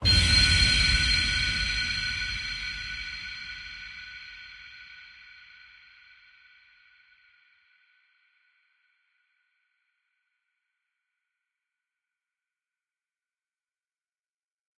Jingle all the way
action, awesome, budget, cinema, cinematic, deep, design, dope, epic, film, free, hit, horror, impact, low, low-budget, mind-blowing, movie, orchestral, raiser, scary, sound, sub, suspense, swoosh, thrilling, trailer, whoosh